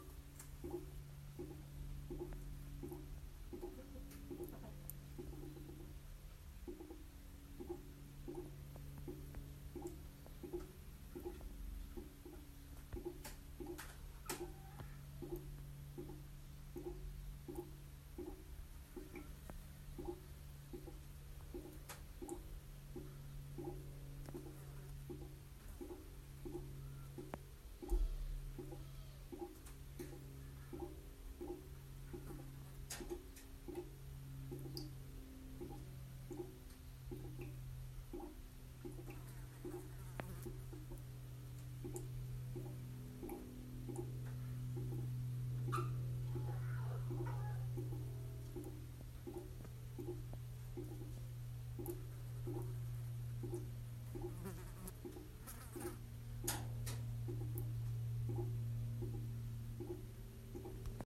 Fermentation airplaintation 09/07/2020
Air gaps releasing an air during fermentation process of apple cider making